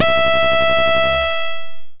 Basic impulse wave 1 E5
This sample is part of the "Basic impulse wave 1" sample pack. It is a
multisample to import into your favourite sampler. It is a basic
impulse waveform with some strange aliasing effects in the higher
frequencies. In the sample pack there are 16 samples evenly spread
across 5 octaves (C1 till C6). The note in the sample name (C, E or G#)
doesindicate the pitch of the sound. The sound was created with a
Theremin emulation ensemble from the user library of Reaktor. After that normalising and fades were applied within Cubase SX.